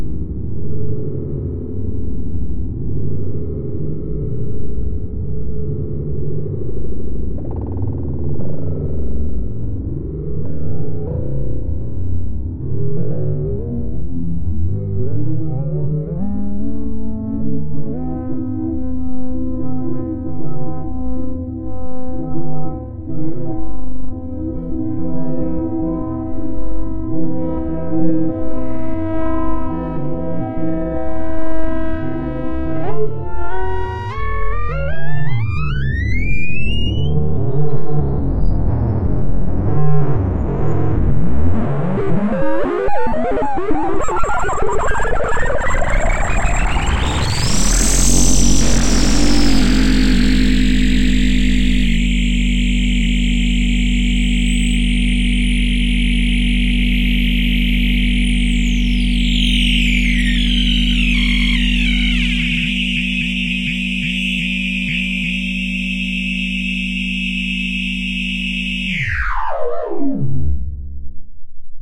Sound experiment: Like a spinning, pulsing ball of rubber